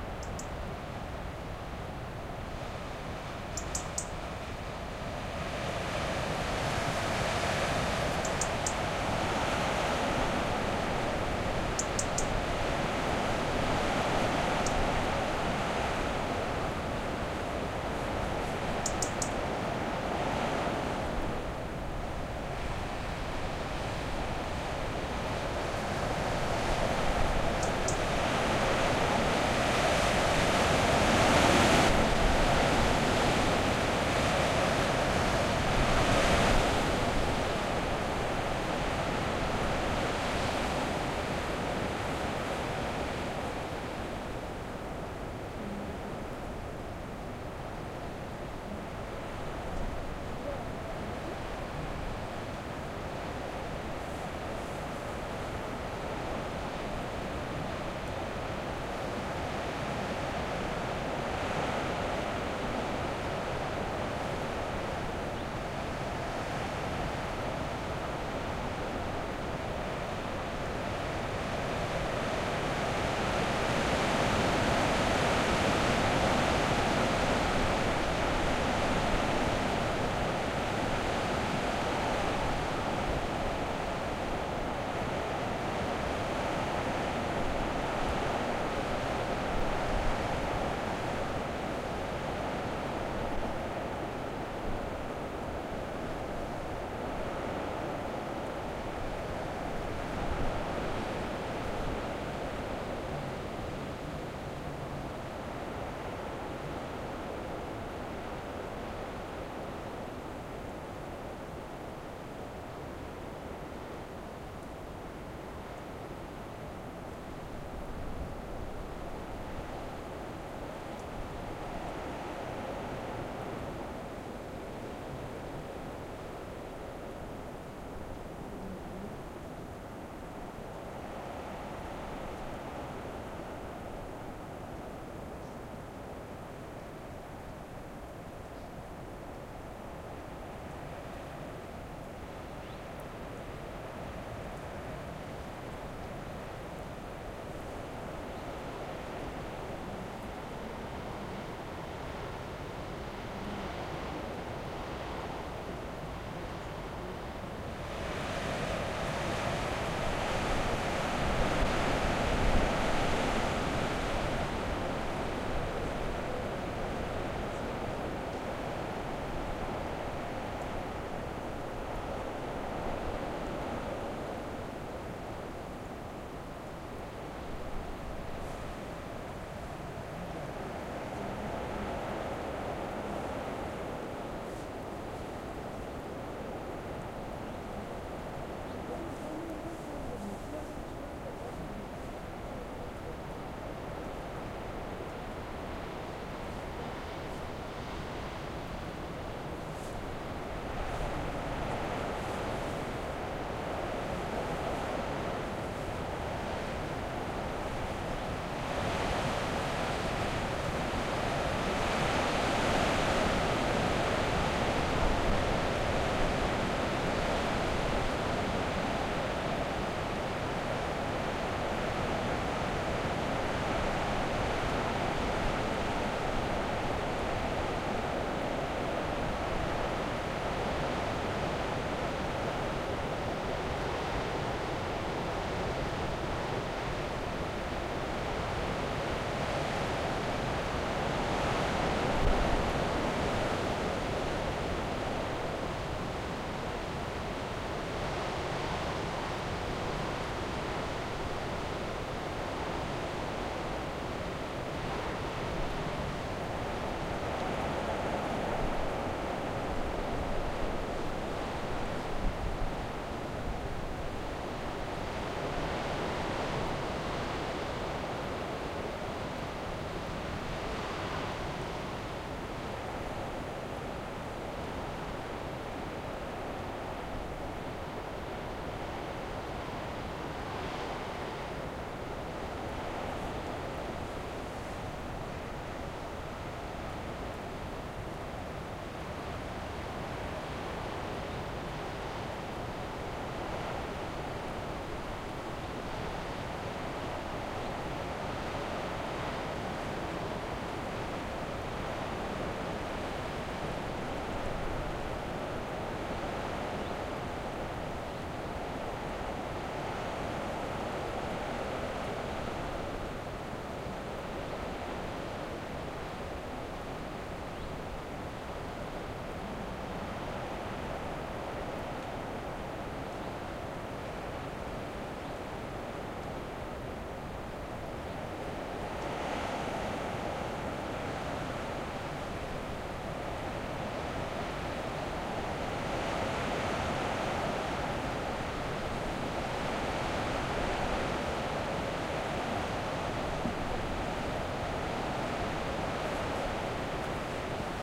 Wind storm hitting the limestone rocks of a cliff near Grazalema (S Spain), a noise more dynamic that I thought at first. Note also the brave little bird that dares to sing in this unfriendly scenario. Pair of Shure WL183 with DIY windscreens, Fel preamp, and Edirol R09 recorder.